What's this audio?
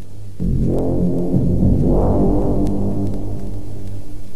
Low-Fi Synth Loop
A low-fi old school synth loop.
loop, low-fi, synth